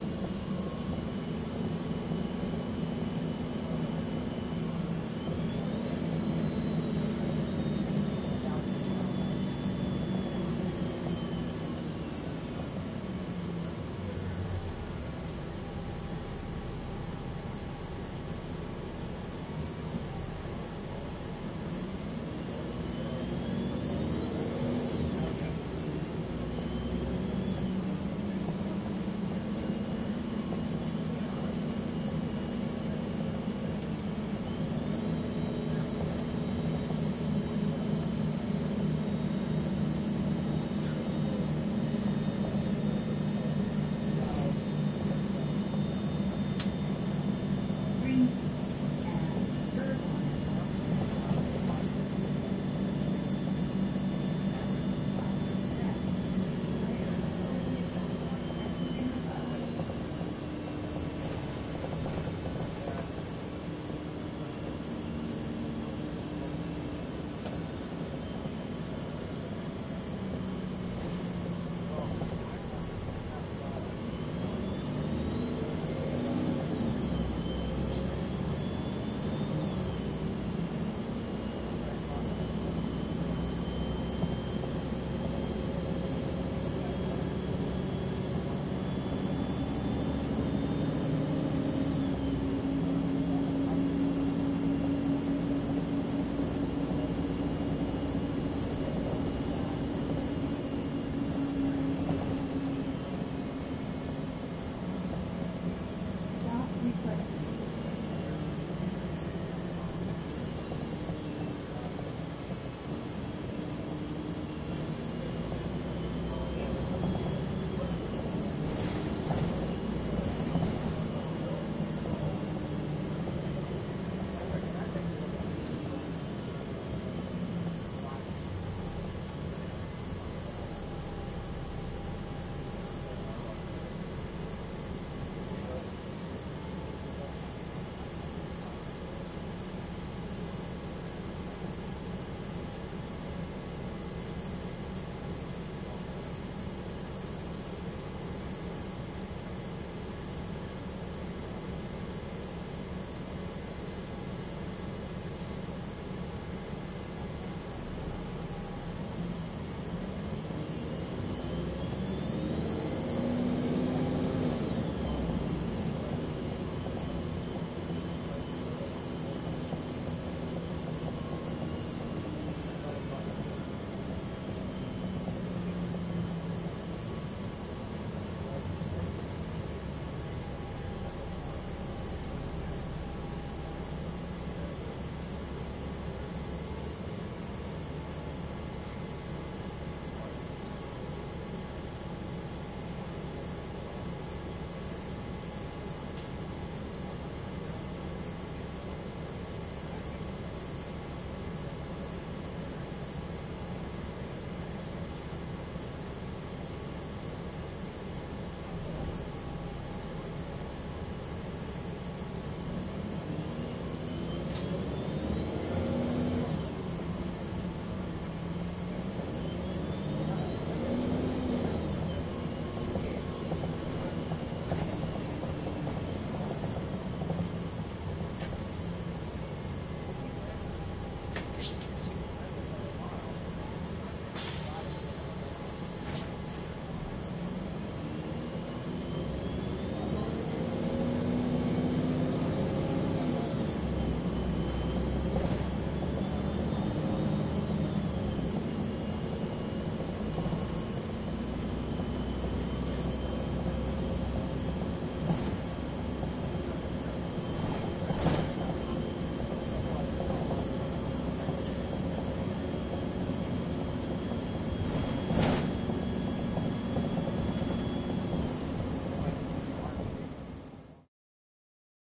bus interior, driving, making stops, passenger chatter, announcements, driving rattle
Recorded with Zoom H2N ambisonic b-format (no z track), *NOTE: you will need to decode this b-format ambisonic file with a plug-in such as the SurroundZone2 which allows you to decode the file to a surround, stereo, or mono format. Also note that these are FuMa bformat files converted from AmbiX format.